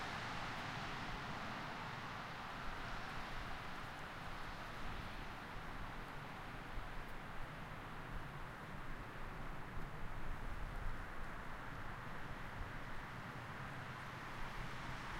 City Street
field-recording, outside, public, ambience, urban, traffic, Street, City, car